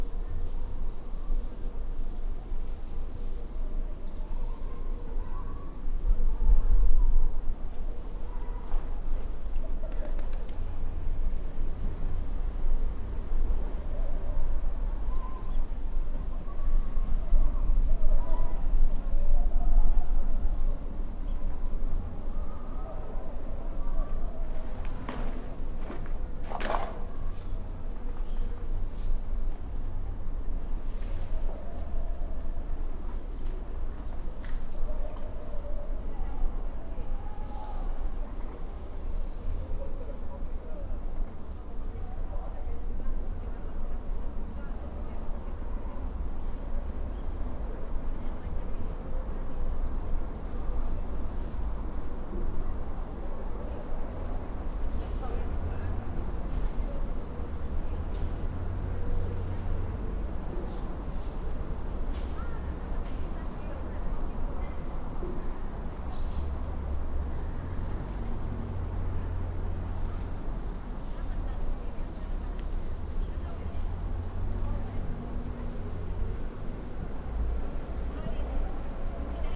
outdoors generic ambient
ambient, generic, outdoors